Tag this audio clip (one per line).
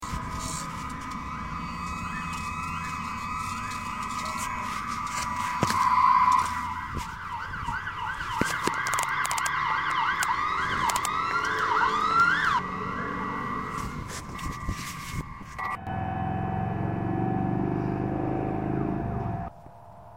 clicks
drone
sculpture
siren
steel
strings
traffic
vibration
wind